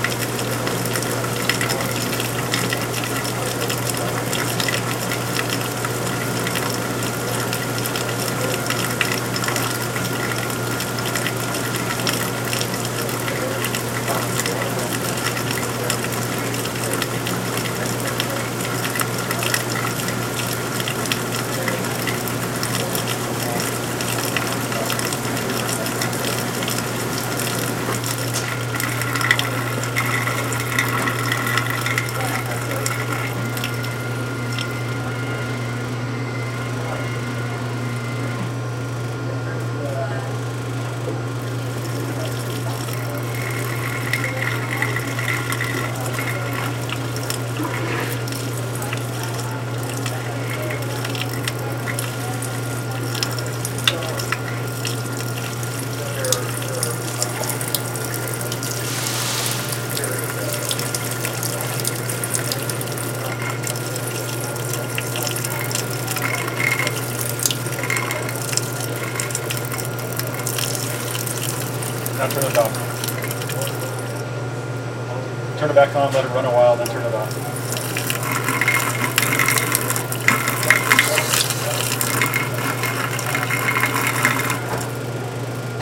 faucet on and then off
This is a recording of a sink at the Folsom St. Coffee Co. in Boulder, Colorado. The water faucet is turned on, water pours slowly into the sink, then the faucet is turned off.